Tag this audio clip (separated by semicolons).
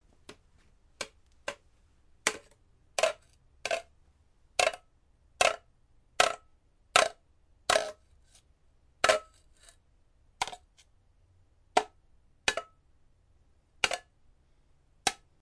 shake; rattle; kids